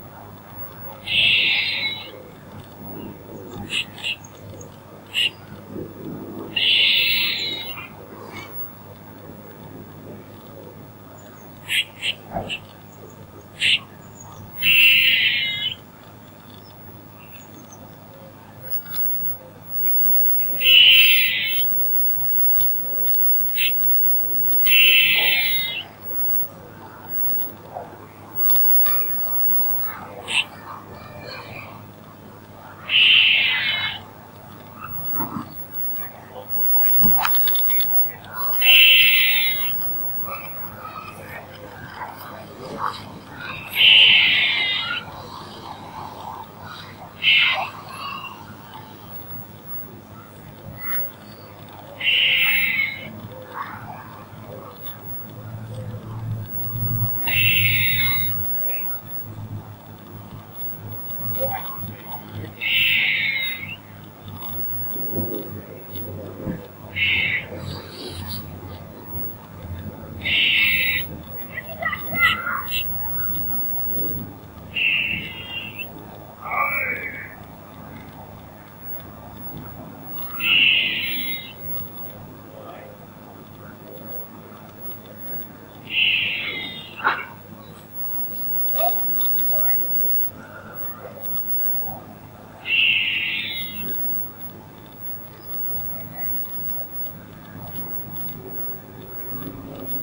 Squirrel Call
A grey squirrel at the edge of a wood seemed quite upset and repeated called for about 5 minutes. I recorded the end of its calling.
It is also my first attempt at recording sound with my camera (Canon EOS 550D). There turned out to be a fan noise with a slight clicking. I ran noise reduction in audacity to remove it and to help reduce the sound of traffic.
animal, life, call, sciurus, carolinensis, field-recording, wild, edit, distress